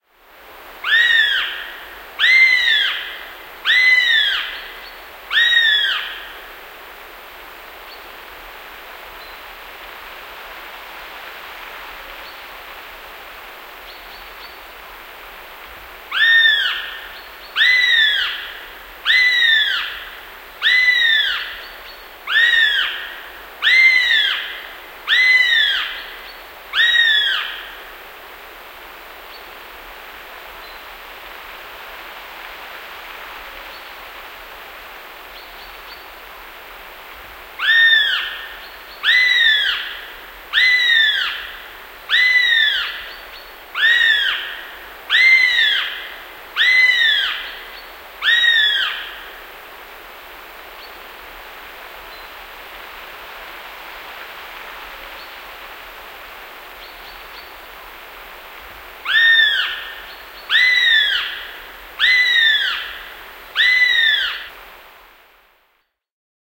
Kanahaukka, huuto / Goshawk calling in the forest, some small birds in the bg, trees humming (Accipiter gentilis)
Kanahaukka huutelee jaksottain vähän kauempana. Taustalla puiden kohinaa ja hyvin vähän pikkulintuja. (Accipiter gentilis)
Paikka/Place: Suomi / Finland / Salo, Särkisalo
Aika/Date: 09.07.1997
Bird, Call, Field-Recording, Haukat, Hawk, Huuto, Kanahaukka, Nature, Suomi, Tehosteet, Yleisradio